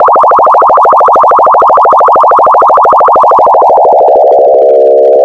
laser gun 1
SFX suitable for vintage Sci Fi stuff.
Based on frequency modulation.
gun, laser, laser-gun, scifi, synth, vintage